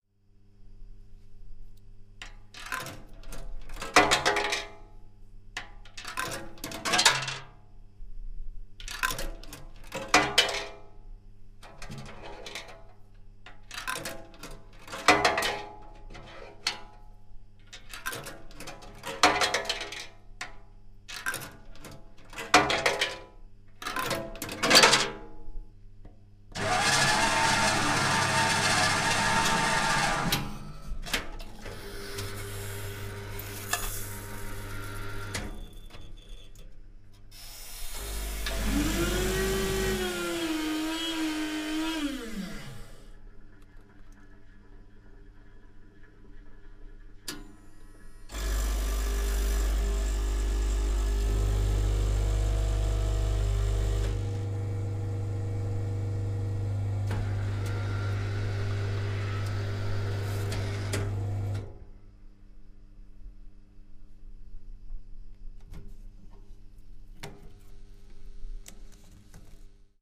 Coffee Vending Machine
bar, cafe, coffee-machine, espresso, machine